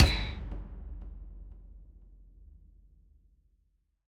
Sound For The Peaceful 16 - Percussion Instruments developed by Nova Sound and Erace The Hate for the Power Two The Peaceful Campaign. Sounds Designed By Nova Sound
We need your support to continue this operation! You can support by: